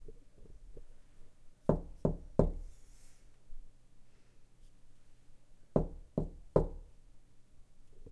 knocking on door
Knocking on a wood door
wood, door, knocking